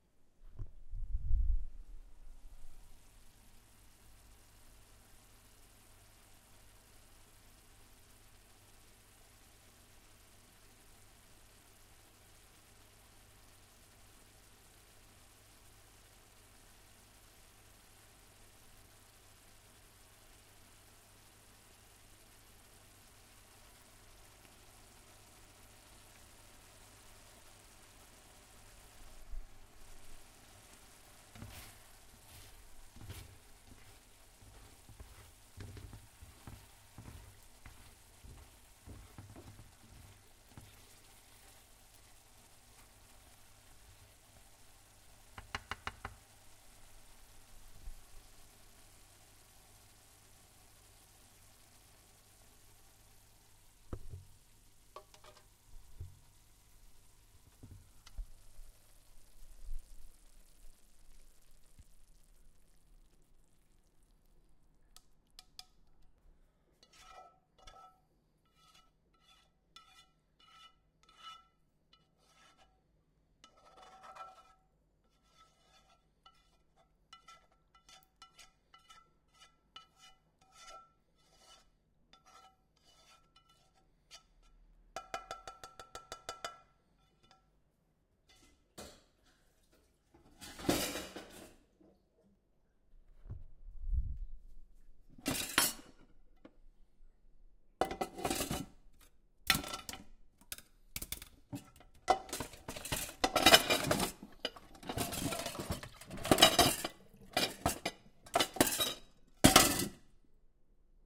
completing the cooking light blemishes, applying to the plate and throwing dishes in the sink
applying, blemishes, completing, cooking, dishes, light, plate, sink, throwing